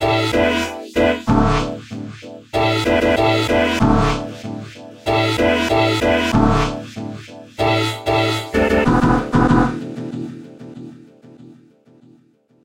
hip hop15 95PBM
dance, jingle, stabs, sound, trailer, instrumental, sample, beat, radio, podcast, club, music, pbm, move, hip-hop, background, broadcast, part, drop, chord, intro, disco, dancing, loop, rap, stereo, mix, pattern, interlude